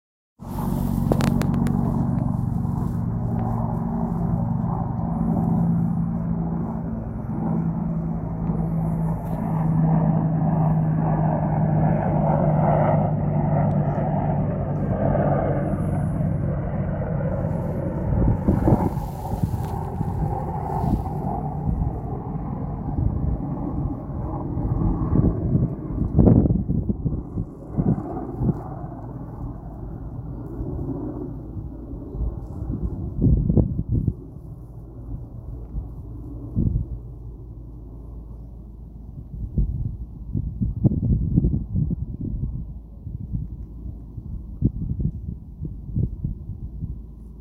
Apache flypast
air, flight, Helicopter, military